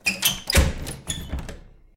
Door close inside02
Closing a wooden door with a squeaky metal handle. Natural indoors reverberation.
close, door, home, house, indoor, slam, squeak, wood